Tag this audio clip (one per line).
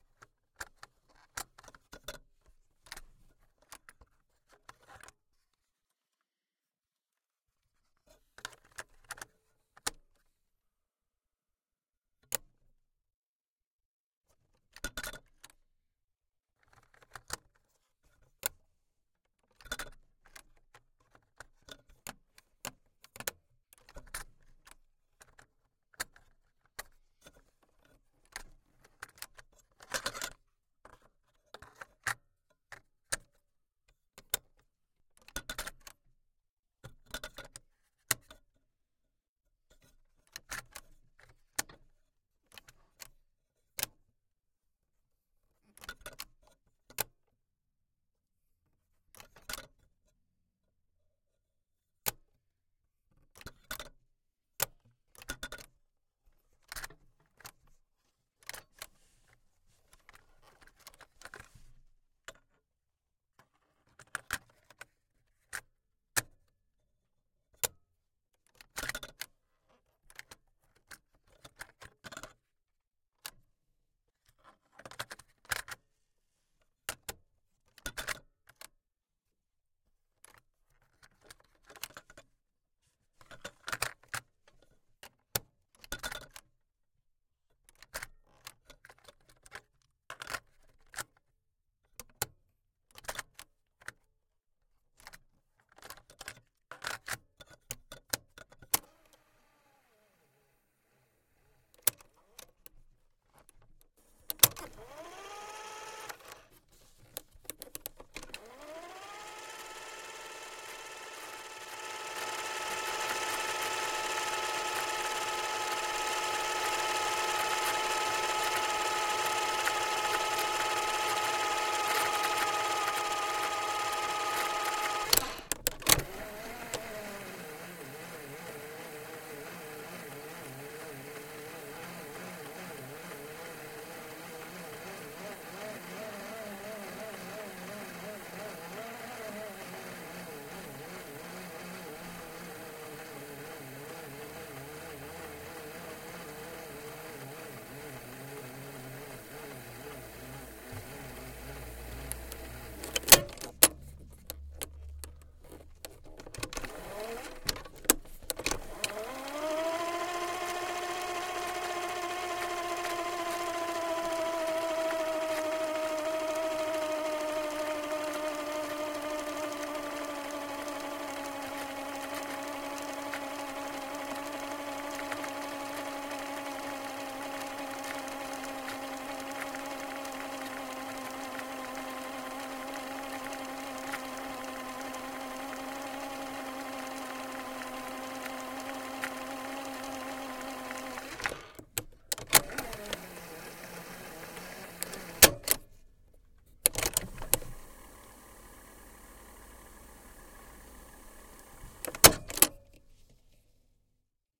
recorder
Foley
technology
eject
player
recording